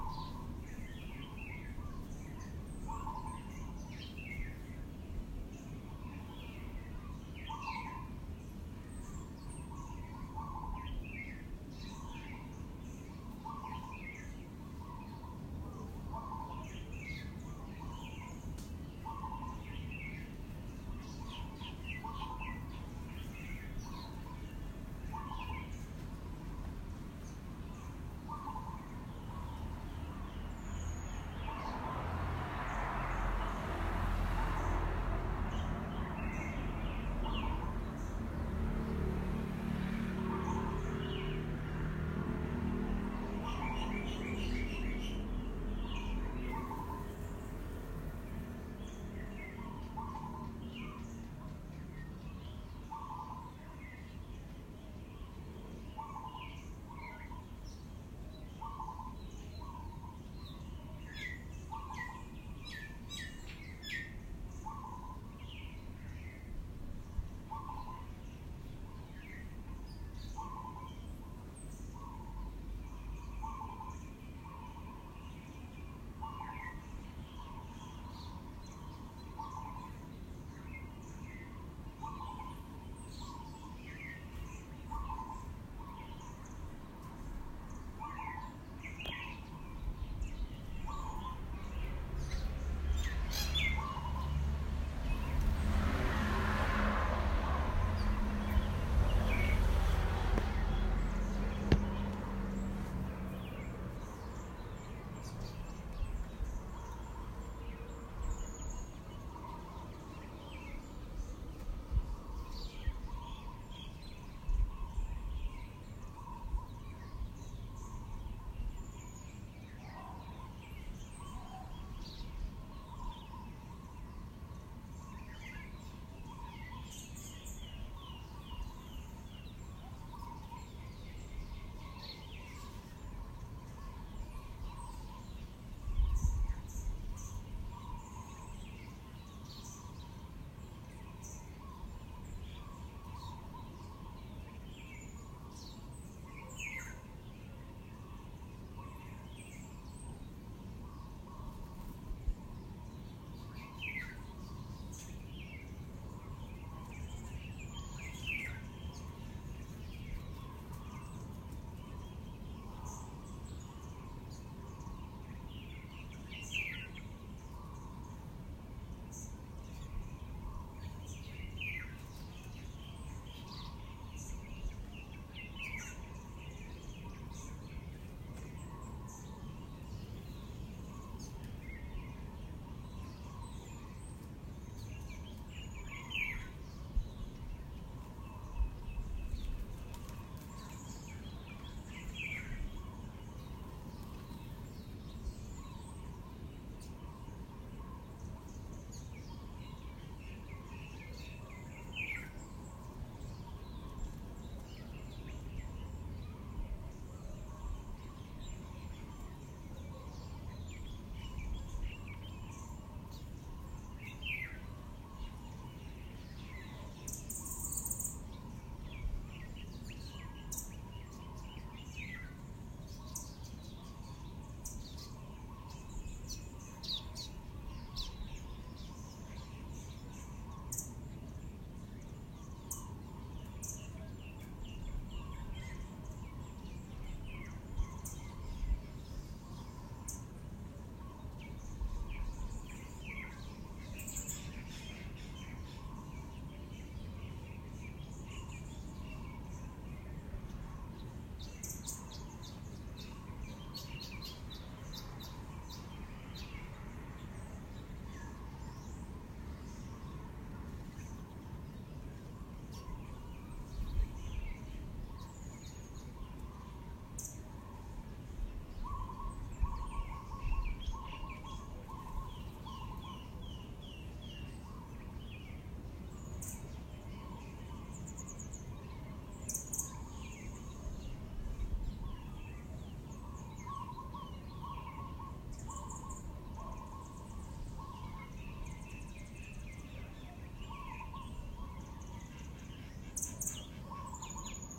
Birds in Mauritius
Sound of birds in the trees. There is a street nearby where the occasional car or motorcycle comes by, but it is not that busy.